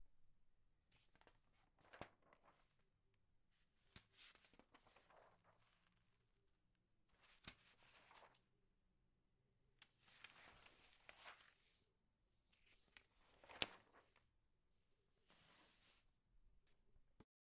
Newspaper Pages
This is the sound effect of someone turning the pages of a newspaper. Recorded with Zoom H6 Stereo Microphone. Recorded with Nvidia High Definition Audio Drivers. This effect was post processed to reduce background noise.
Newspaper, OWI, Paper, Turning-Pages